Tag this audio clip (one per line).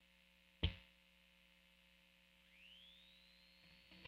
noise
hum